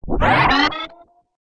I used FL Studio 11 to create this effect, I filter the sound with Gross Beat plugins.